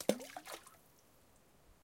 Tossing rocks into a high mountain lake.
splash, splashing, bloop, water